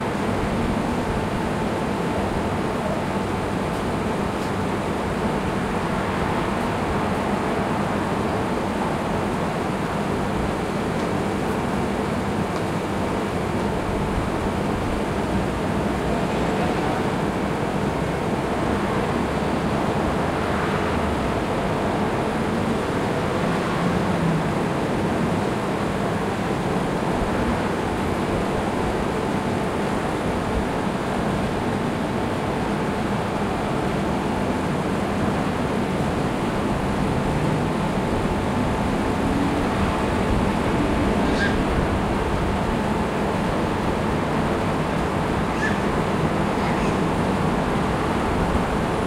air conditioning 5
Hum of two air conditioning split-systems (outdoor part).
Recorded 2012-10-13.